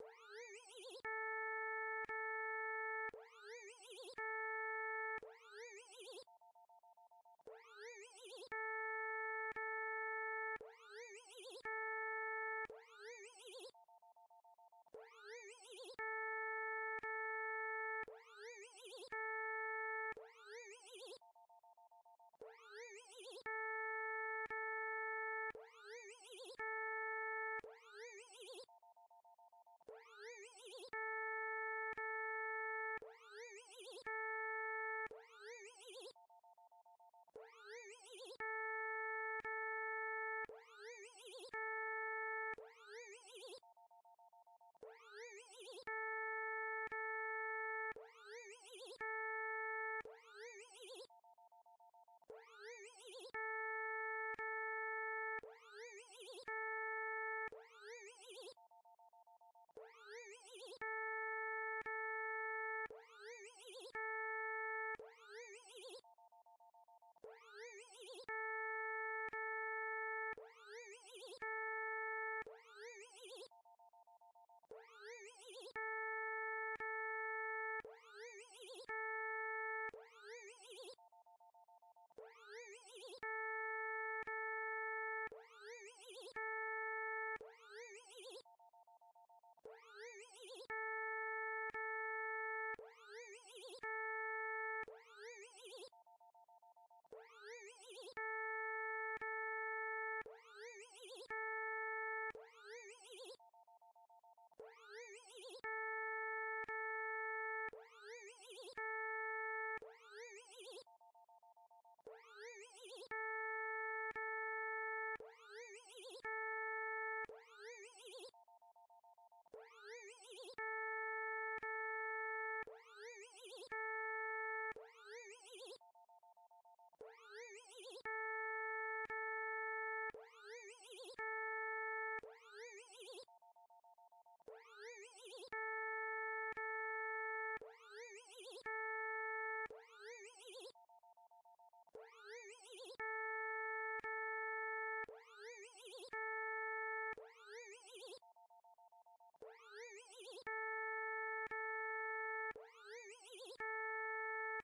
iPod malfunction
My iPod freaking out.
ipod, machine, breakdown, blip